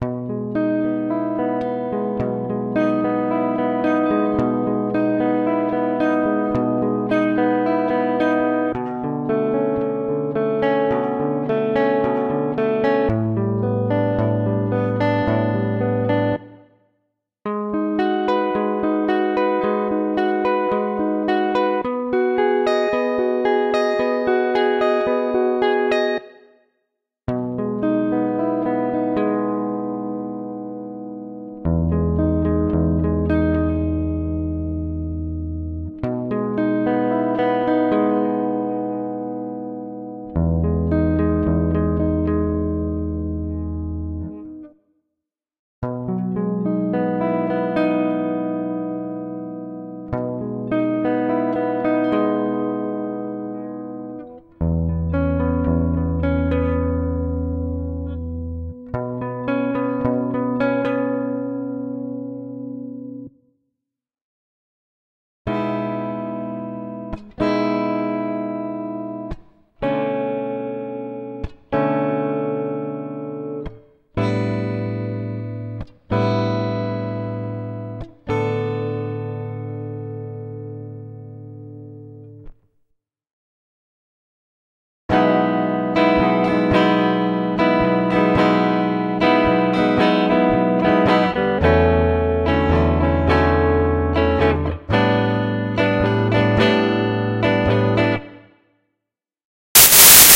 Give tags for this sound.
calm
chord
cinematic
dramatic
electric
exp
film
guitar
Hollywood
indie
intro
movie
picking
postrock
sad
slow
soundtrack
sountracks
theme
tragedy

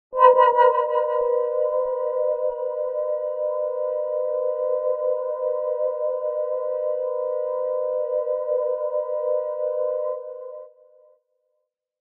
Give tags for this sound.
dark
chord
hit
pad

